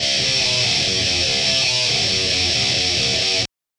THESE LOOPS ARE ALSO 140 BPM BUT THERE ON A MORE OF A SHUFFLE TYPE DOUBLE BASS TYPE BEAT OR WHAT EVER YOU DECIDE THERE IS TWO LOOP 1 A'S THATS BECAUSE I RECORDED TWO FOR THE EFFECT. YOU MAY NEED TO SHAVE THE QUIET PARTS AT THE BEGINNING AND END TO FIT THE LOOP FOR CONSTANT PLAY AND I FIXED THE BEAT AT 140 PRIME BPM HAVE FUN PEACE THE REV.